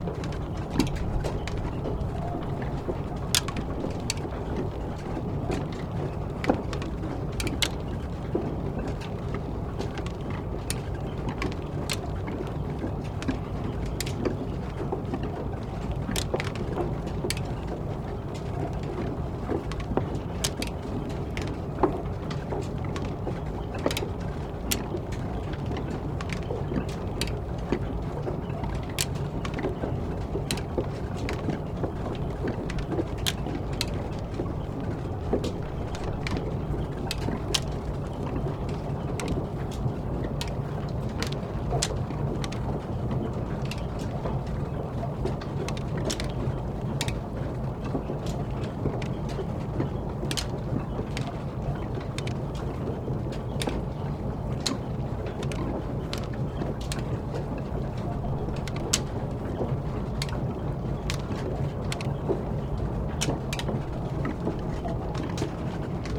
SE MACHINES MILL's mechanism 03
One of the machines in watermill.
rec equipment - MKH 416, Tascam DR-680